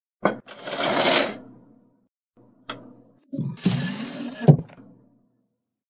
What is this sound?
Opening and closing the drawer
OFFICE SOUND FX - home recording
closing, drawer, Opening